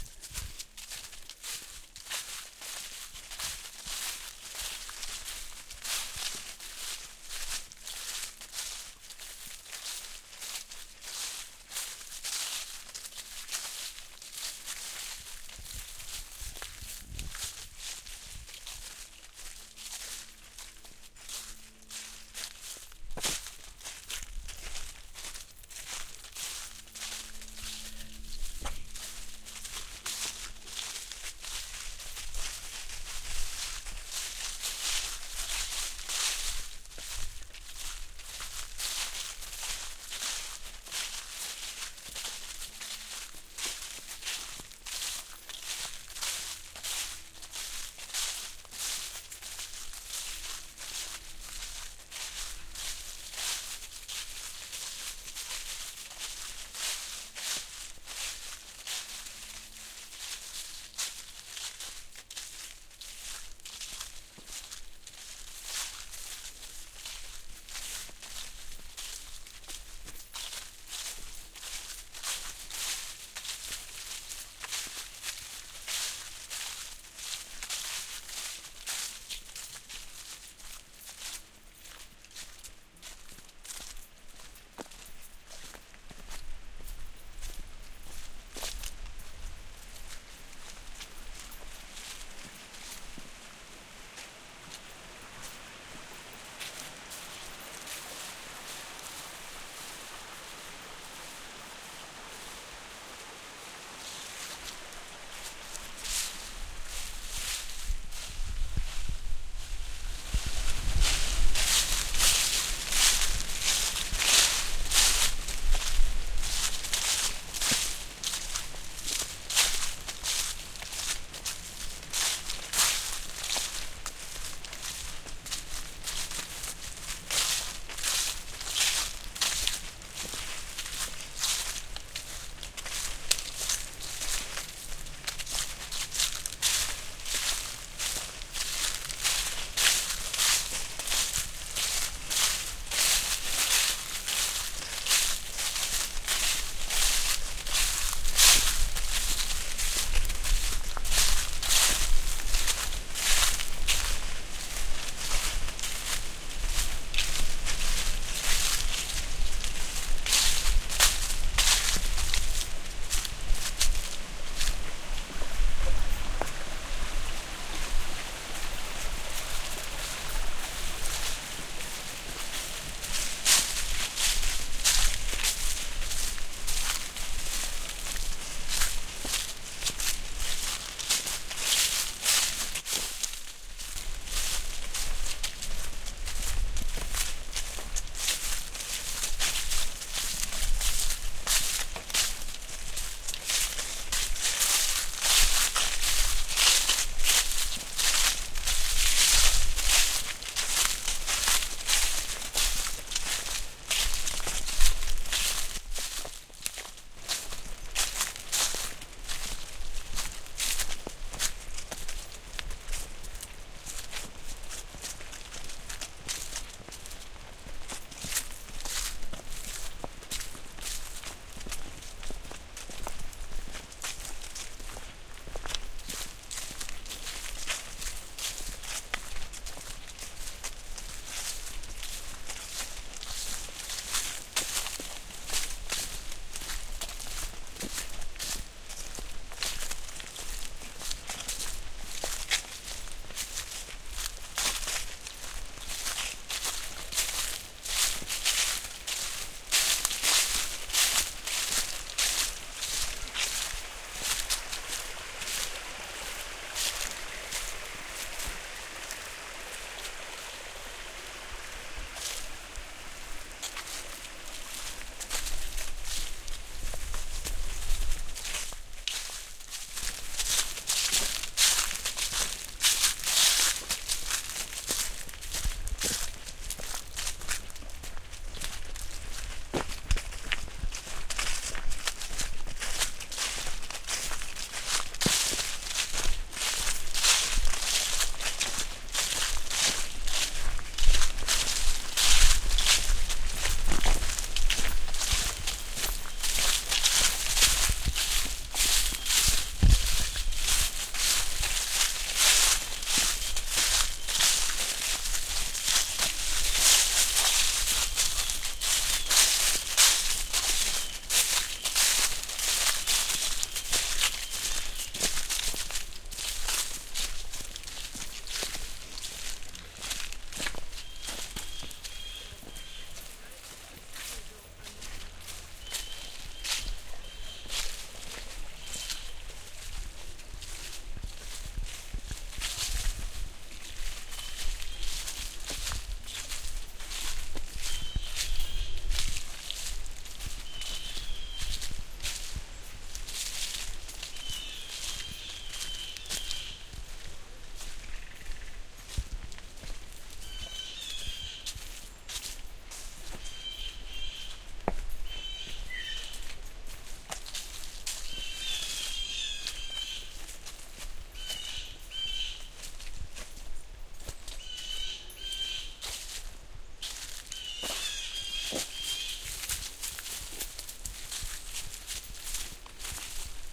Stowe Brook 3/Footsteps in Dry Leaves
A brook in a forest in Stowe, Vermont, recorded in mid October with a Marantz PMD661 using an Audio-Technica BP4025 stereo microphone. You can hear my footsteps as I'm walking through dry leaves, the splashing water as I stop several times by a brook, and, towards the end, some crows cawing in the trees.
dry-leaves, ambient, footsteps, crows, Vermont, forest, brook, stream, field-recording, water